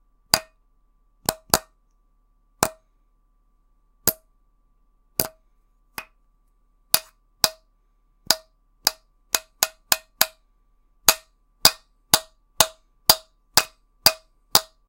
Light metal impacts, almost plastic sounding.